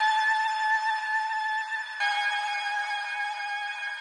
120 Concerta Numb piano 01
numb lofi piano
concert, free, layer, piano, loop, string